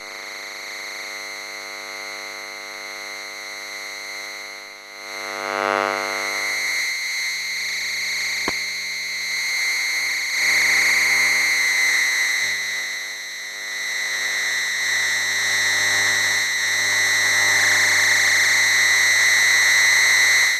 [Elektrosluch] Power Plug Under load
Electromagnetic field recording of a switch mode power plug using a homemade Elektrosluch and a Yulass portable audio recorder.